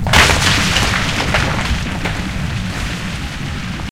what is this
Moving a boulder
A LARGE boulder being moved inside a mine.
and
TY all for helping me to make the perfect sound.